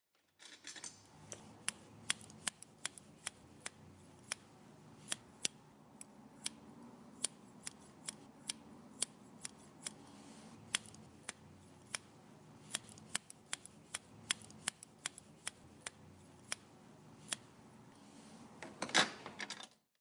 Very sharp haircutting scissors snipping away.